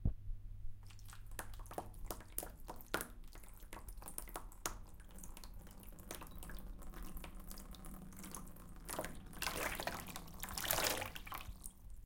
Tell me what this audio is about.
water in stone
Water flowing over the stone
river, stone, water